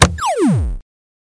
Turning off a futuristic TV
Bwooooooop! Recorded for the visual novel, "The Pizza Delivery Boy Who Saved the World".
turning-off, off, tv, bwoop, turn, turn-off, television